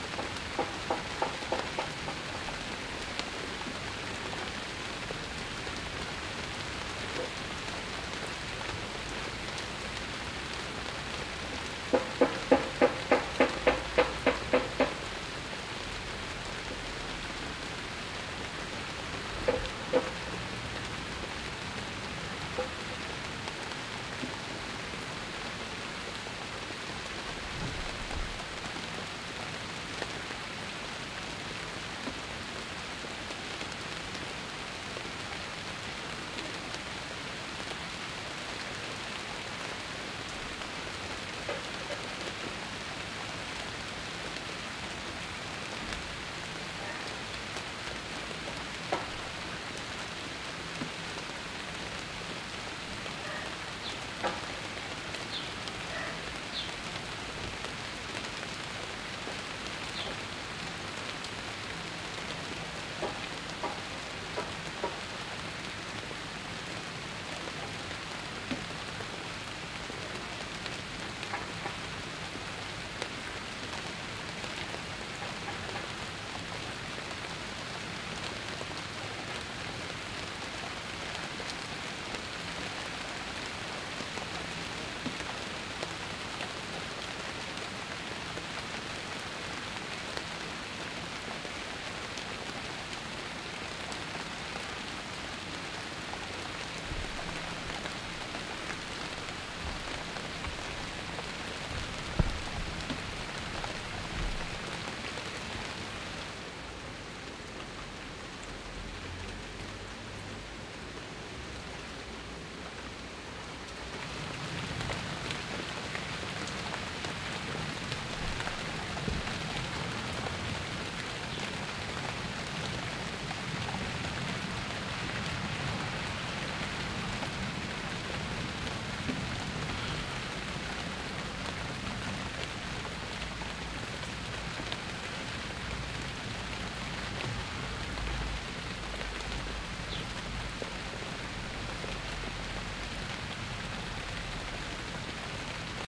Soft rain with background noises
Recorded on Canon XF100 Camcorder with RODE NTG2 microphone